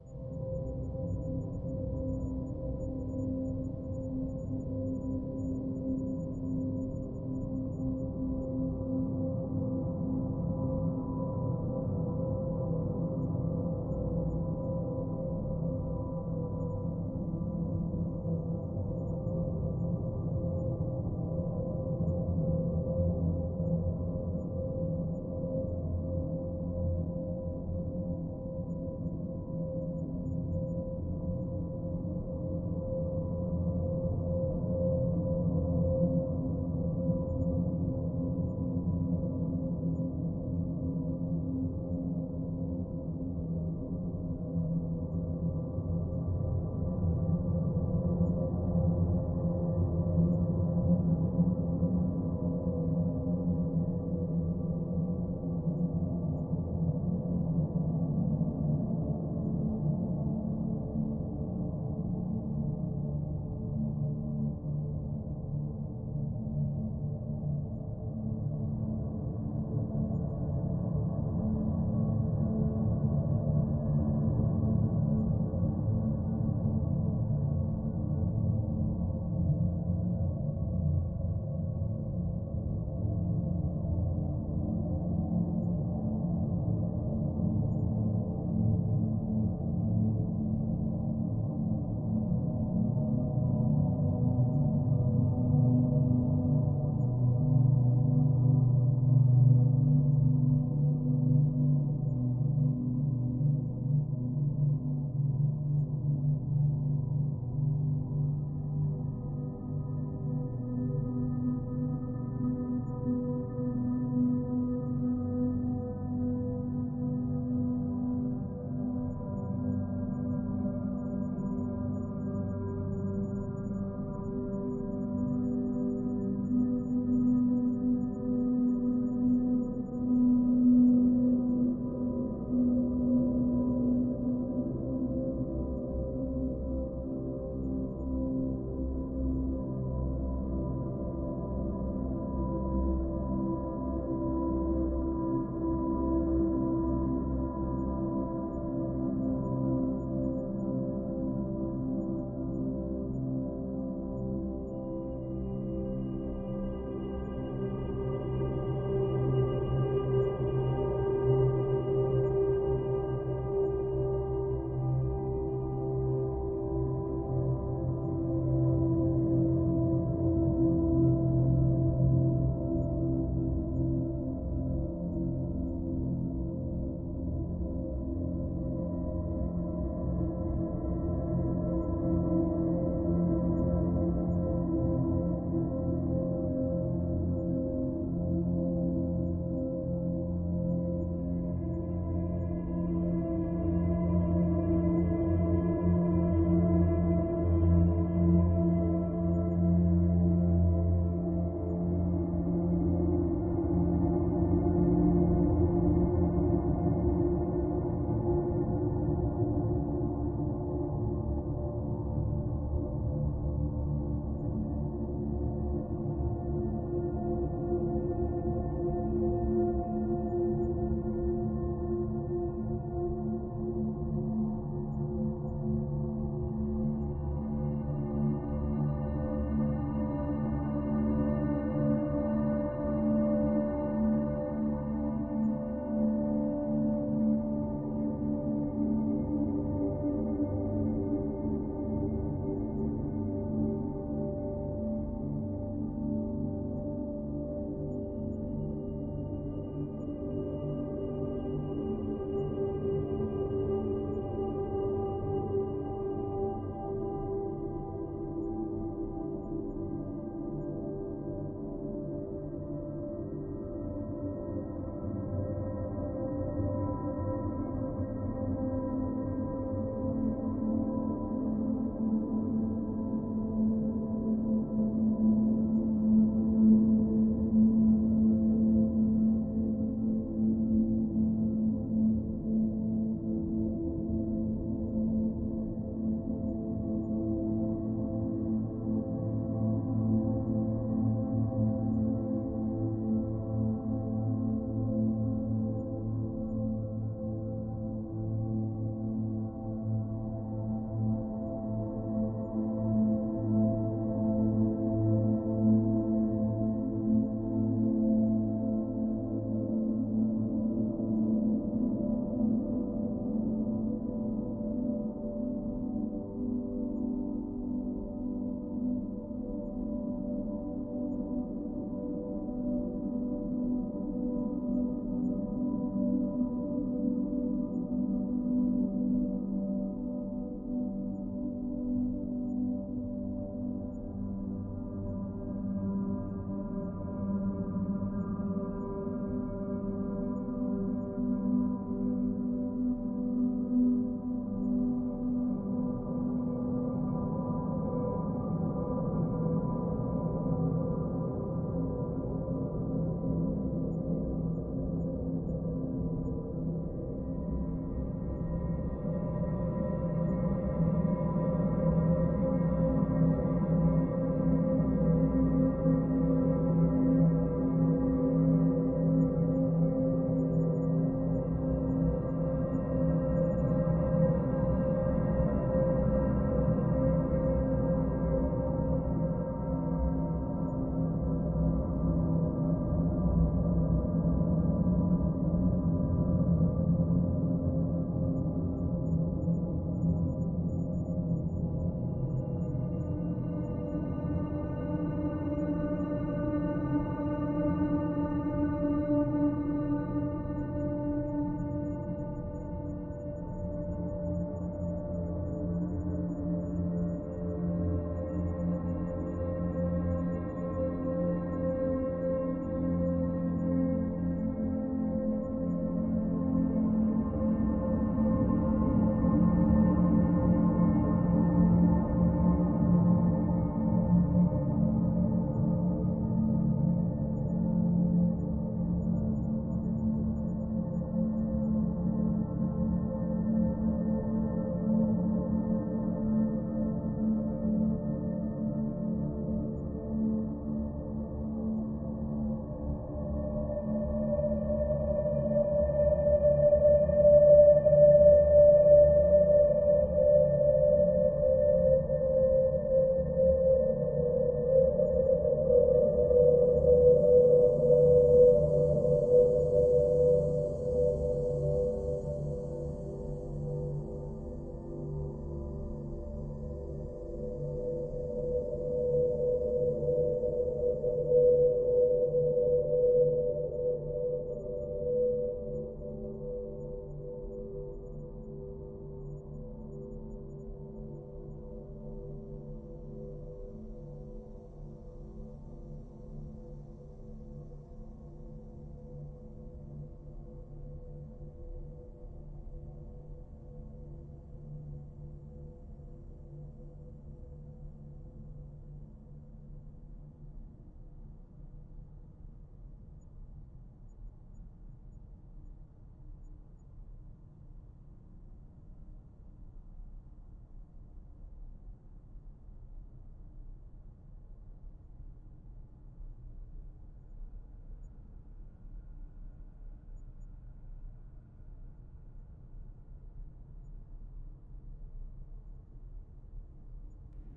Scary Drone
Edited from Piano Playing.
drone, atmosphere